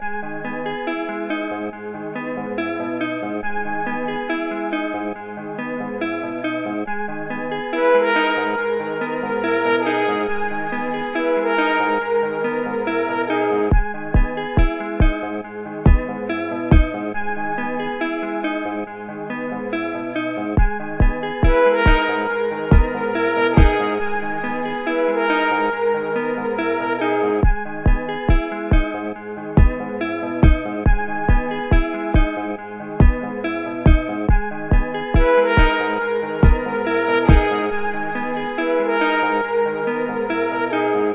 Creepy Amish Man
In a nowhere town, in the middle of nowhere - a man, stands.... he just stands there... in the grass not doing anything... the only thing close by is an old amish furniture store...
creep, drama, middle, suspense, creepy, spooky, scary, farm, amish, thrill, town, man, horror, nowhere